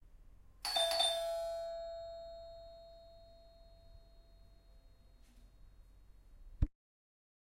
ringing the doorbell
bell, doorbell, home